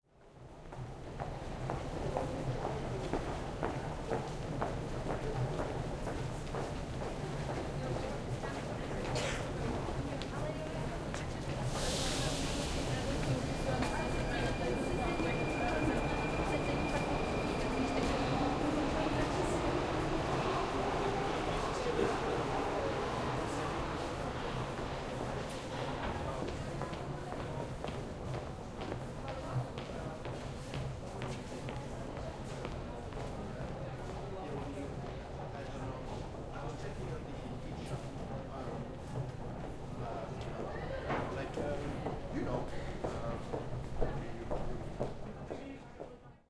Prague subway leaving form the station, crowd of people
Zoom H4N, stereo